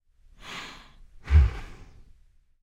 Bear breath, emulated using human voice and vocal transformer
exhale, bears, inhale, breath, breathing, breathe, rump, bear, air, moan